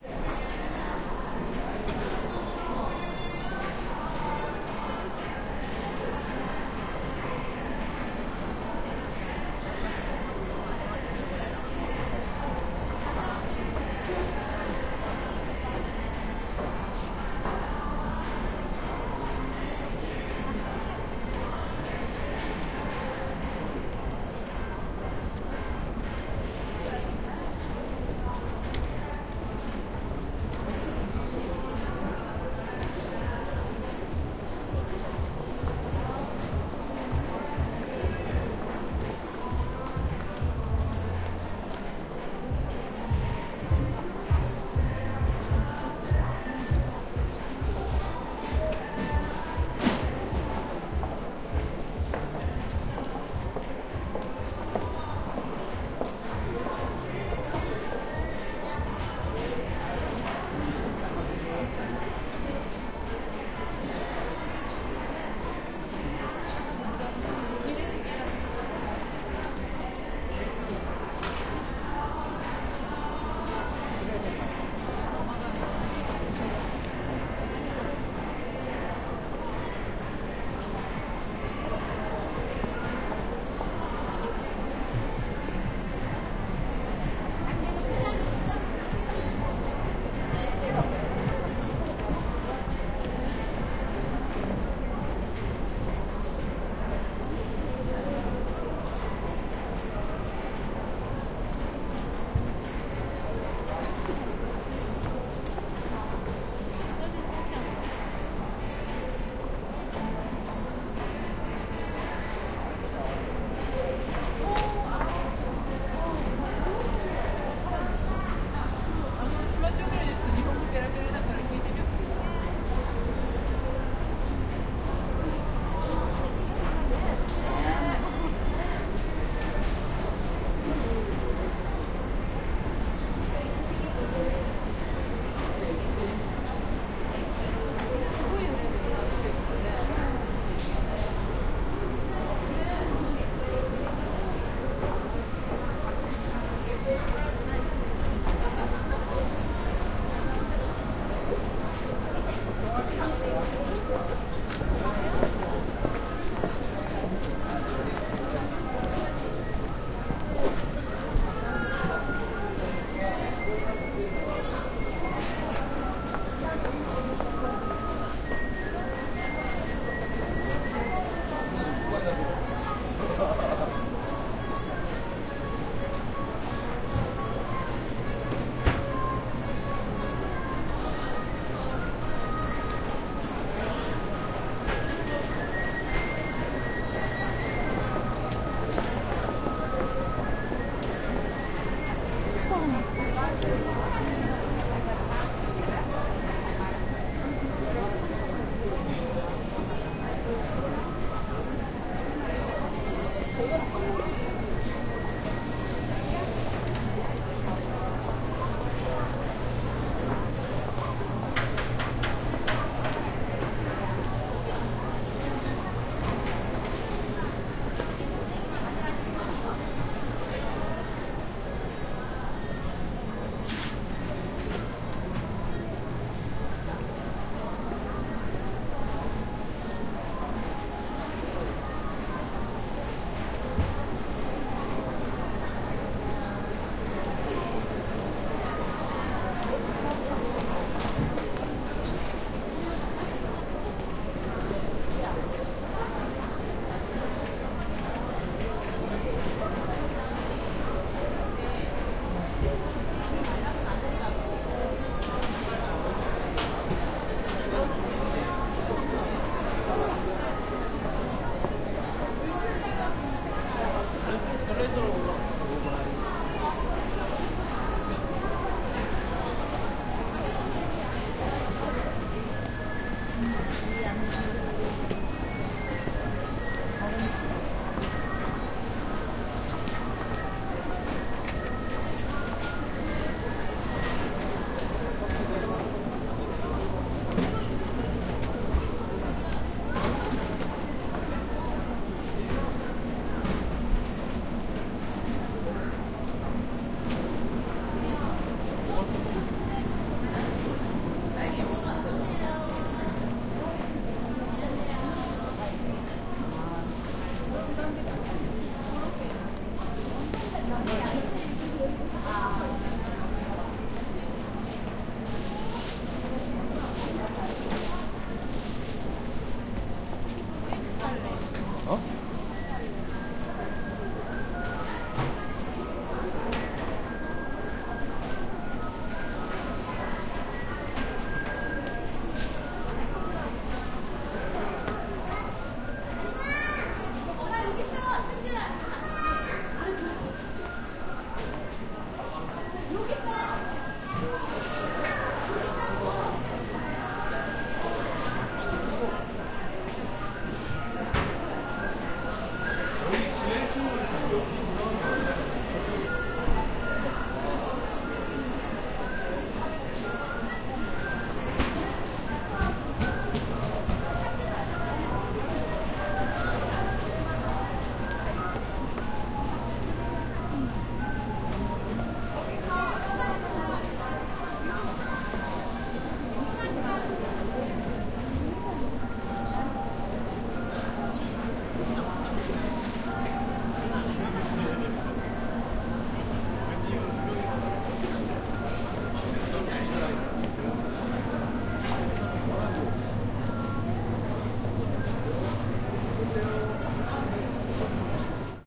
0139 Department store 4
Music and people in the background. Walking in a department store and in a supermarket. People paying
20120122
footsteps, field-recording